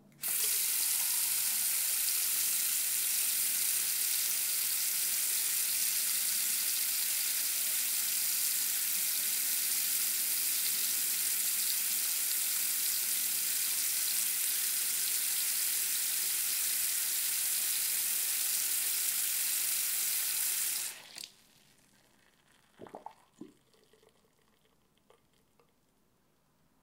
Faucet On/Off Close
Turning my faucet on, letting the water run, and turning it off recorded 2 feet away
running, faucet, flowing, water, sink, bathroom